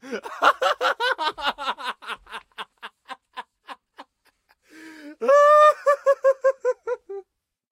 Male Laugh Hysterical 01
A snippet of myself laughing during a recording session. Recorded with an AudioTechnica 4050 through an Allen & Heath GS-R24 in a sound-proof room at the sound studio of Belgrade's Faculty of Dramatic Arts. No processing has been applied in the form of EQ or compression.